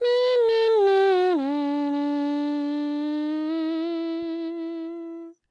electric guitar e minor3
Imitation of electric guitar solo part in e-minor. I almost close the mouth, sing some tones and blow little air for distortion effect.
beatbox, dare-19, distorted, guitar, sing, solo, voice